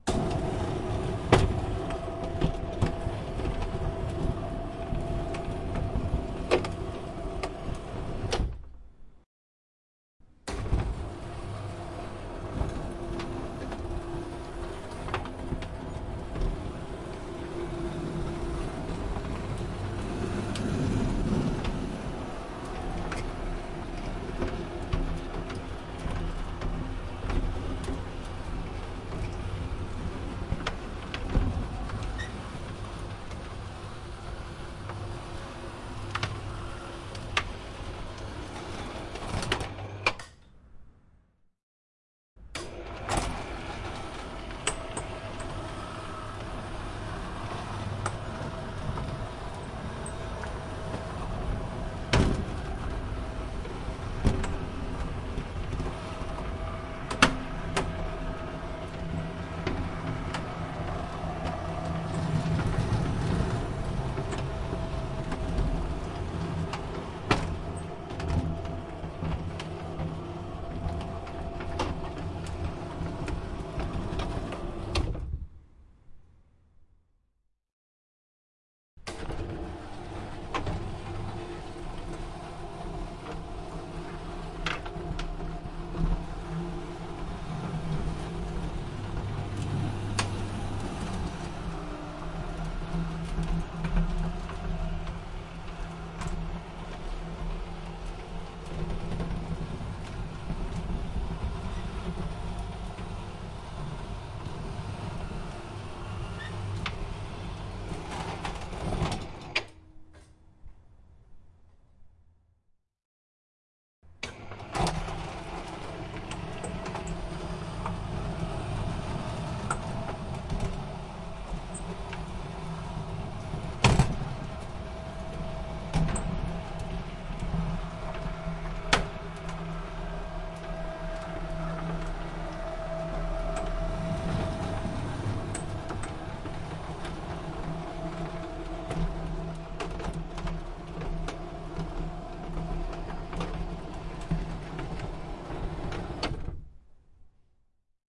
This is the recording of an electric roller shutter.
The audio file contains 5 takes of an electric metallic roller shutter rolling and unrolling.